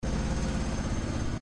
sounds that r going to be used to an art performance in Athens